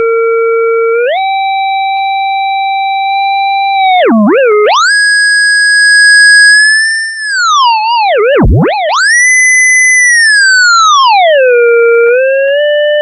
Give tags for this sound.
free; mousing; sample; sound

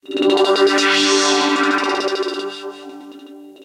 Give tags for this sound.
effect; sweep; synth

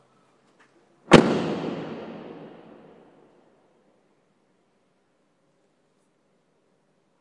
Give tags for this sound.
automobile; door; underground; driving; vehicle; field-recording; car

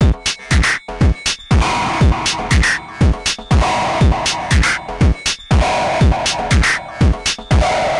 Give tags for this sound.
120; 120-bpm; 120bpm; beat; bpm; distorded; drum; drum-loop; drums; eletronic; hardcore; industrial; loop; noise; noisy; rhythm

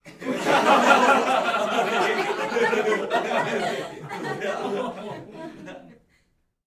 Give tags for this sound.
adults; audience; chuckle; theatre; haha; laughing; laughter; laugh; funny; live; fun

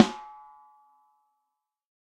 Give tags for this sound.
drums; combo; mic; beyer; technica; multi; layer; microphone; snare; velocity; shure; electrovoice; samples; sample; breckner; layers; neumann; microphones; josephson; 14x6; kent; mics; accent; drum; ludwig

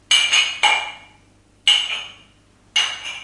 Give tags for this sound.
cups; clashing

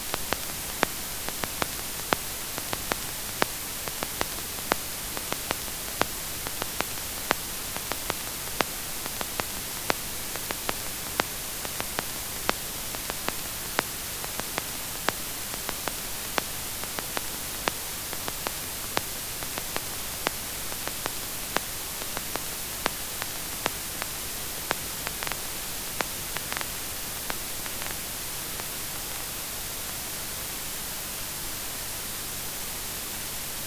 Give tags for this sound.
atari; circuit-bent; loop; noise

